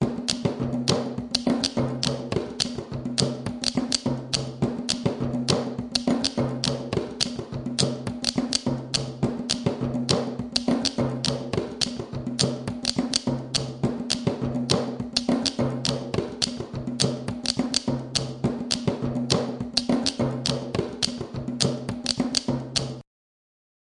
a "drum orchestra" plays in a room
have fun!!
loop, percussion, drums